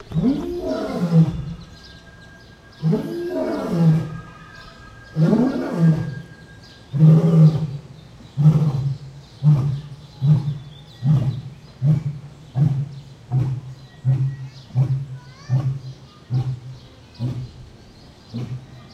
Close-up recording of an African Lion roaring. Recorded with a Zoom H2.